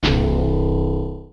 video game sounds games
game, games, sounds, video